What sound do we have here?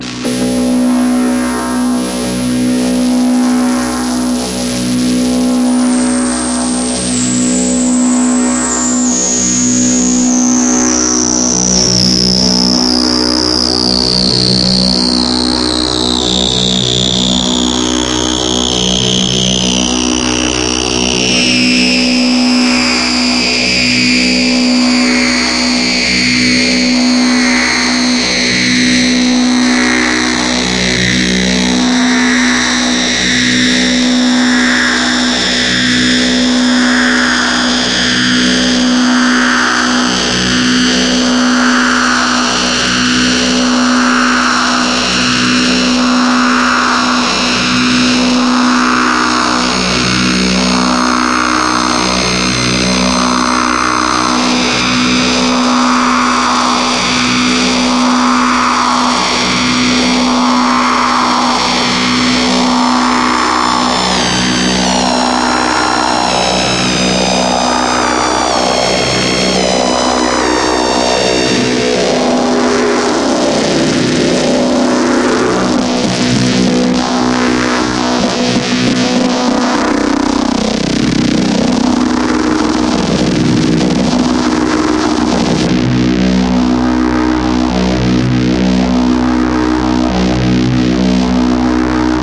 WARNING: Harsh noise with resonant malefactors. Bad Q ! BAD Q!
Noise sound through analog phase shifter and Steiner Parker filter through a holtech-based delay. I imagine this as a soundtrack to an ultraviolent horror movie scene.
synth, analog, phase, shifter, noise, vcf, modular, vco